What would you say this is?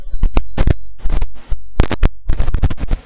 glitch and static type sounds from either moving the microphone roughly or some program ticking off my audacity
glitch, digital, static, electronic, noise